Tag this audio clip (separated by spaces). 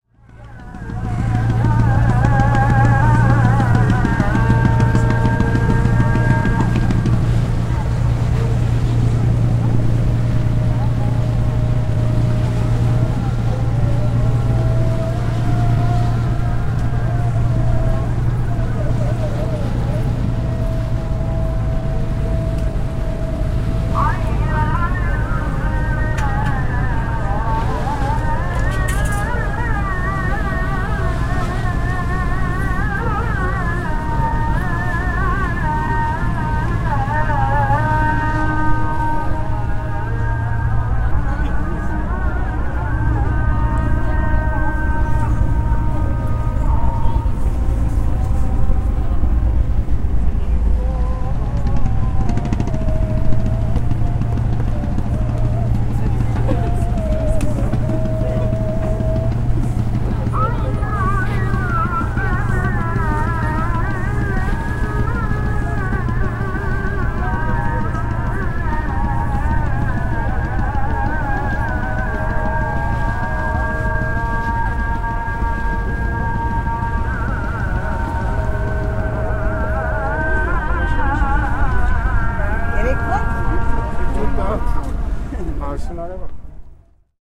geo-ip islam-prayer istanbul uskudar turkey ezan boat